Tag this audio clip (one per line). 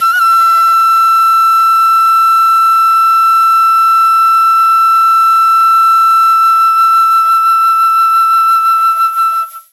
C
Dizi